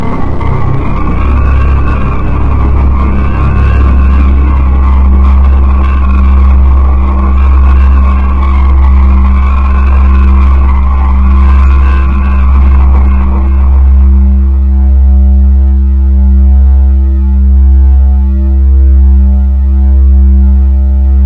5. Crunchy tone with a little LFO.